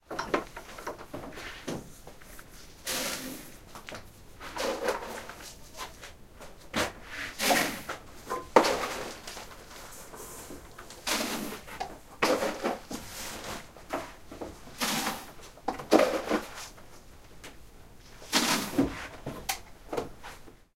Farmer distribute goats food (corn grain, wheet seeds) in a seeries of old wooden feeders, before the milking.